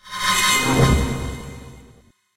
accordion crescendo 5
accordion band filtered remix
accordion, crescendo, transformation